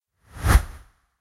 VS Short Whoosh 2
Short Transition Whoosh. Made in Ableton Live 10, sampler with doppler effect.
effect, fast, foley, fx, game, sfx, short, sound, swish, swoosh, transition, video, whoosh, woosh